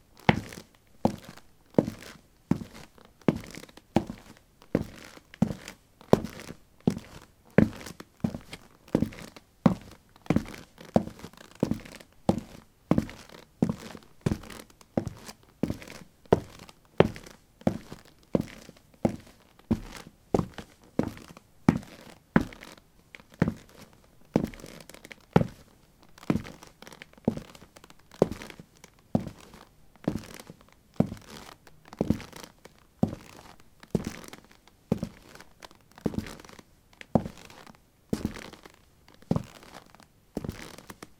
paving 18a trekkingboots walk
Walking on pavement tiles: trekking boots. Recorded with a ZOOM H2 in a basement of a house: a wooden container filled with earth onto which three larger paving slabs were placed. Normalized with Audacity.
footstep, footsteps, step, steps, walk, walking